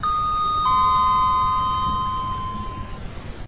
Nothing exciting, just the "going down" chime that modern Otis elevtors play when you call it and it reaches you. If you get what I mean.
chime, otis, elevator, lift